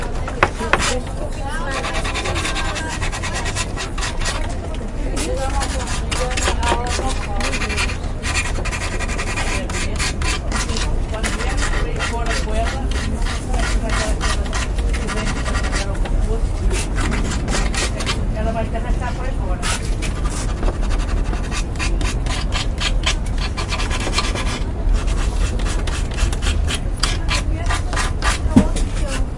sonicsnaps EBG 16
Sanding wood by a carpenter.
Field recordings from Escola Basica Gualtar (Portugal) and its surroundings, made by pupils of 8 years old.